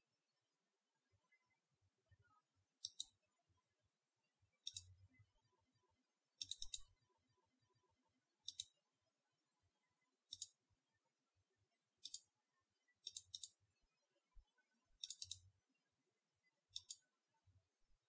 Mouse clicks (PC)
Me clicking on my computer mouse. If you like it, you can use it.
Recorded on microphone - Media Tech SFX Pro 2 MT392 (low end)
Program - Adobe Audition 2 /win xp
dt - 06.10.2014
Click, Computer, Mouse